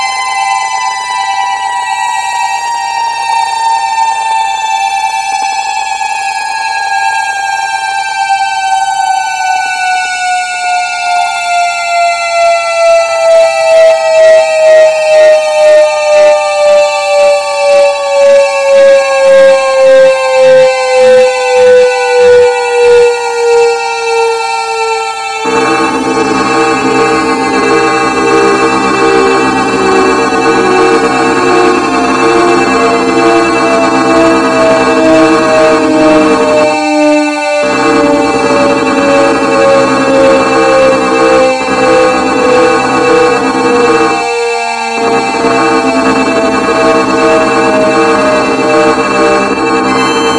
Kind of SPACE vehicle is landing near Boise in Idaho. Steve Gregory was there for taking photos.